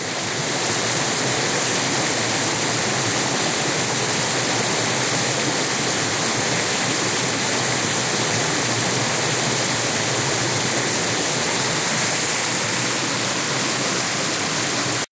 Rushing Water with no wind
Almost sounds like white noise.
This is water from yesterday's rain spilling from a lagoon into Main Reservoir.
ambient, field-recording, nature, reservoir, stream, water